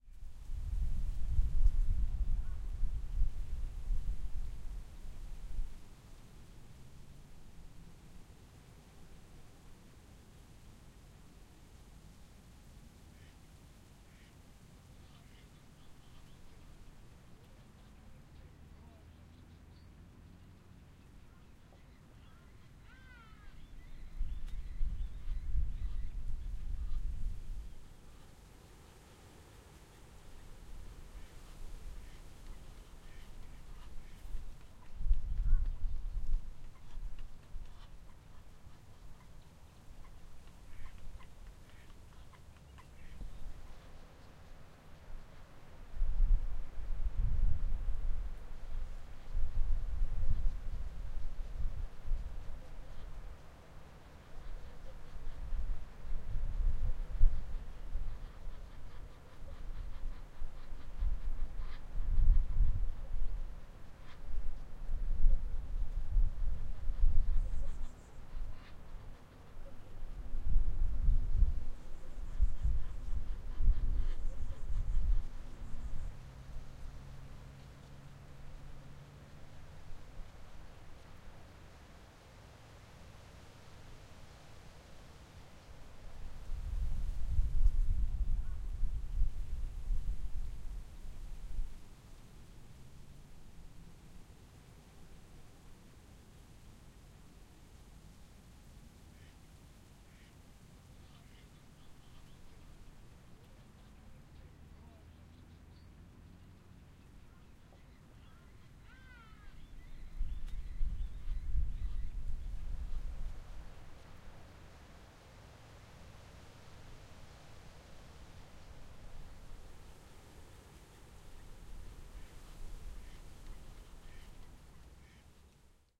Recorded with a zoom H6. On a farm close to water, outside a restaurant.